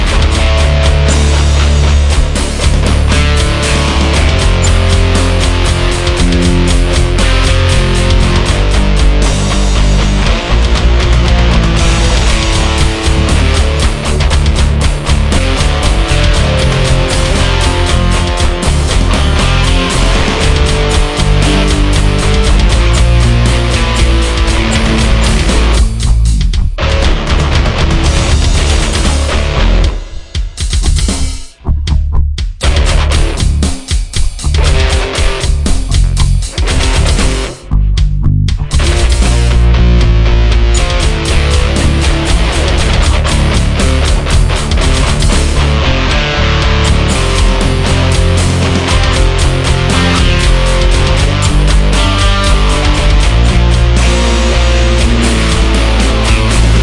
A short loop cut from one of my original compositions.
118, Backing, Bass, BPM, Drums, Guitar, Hard, Metal, Rhythm, Rock